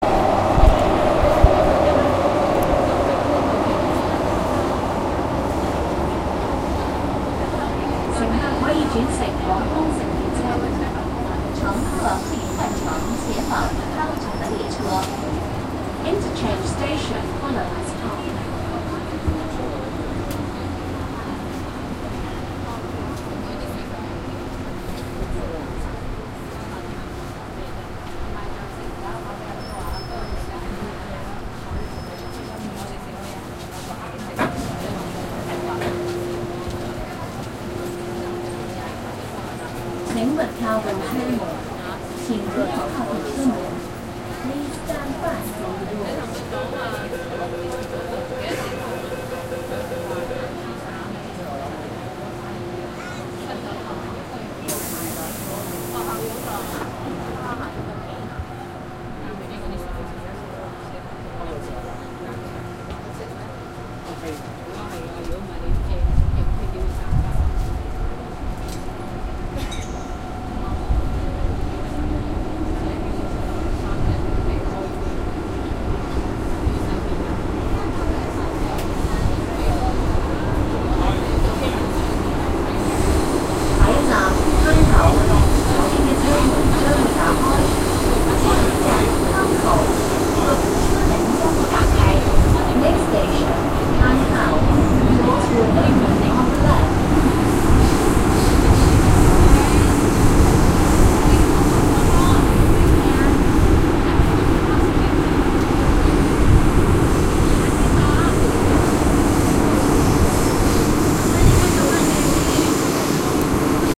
de-noising equipments on C176 FAILS when riding Tseung Kwan O Line
A176, C176, metro-cammell, MTR, TKL, tseung-kwan-o-line